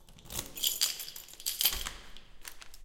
Unlocking Door
Unlocking a door to an apartment in an stairwell.